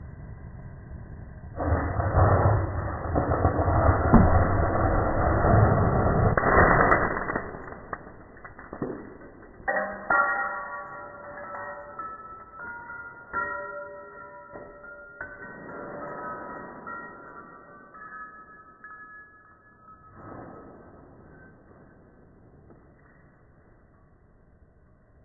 Test Tube explosion and breaking glass 10x slower
Most beautyful sound we have ever shot,
an explosion of a test tube containing Magnesium metal and Copper II Oxide,
it made a lot of damage to my bench but it was worth it !
check out the clip here -> link <-